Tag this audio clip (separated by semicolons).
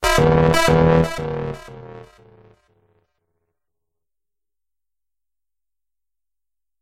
FX; SFX; sound-desing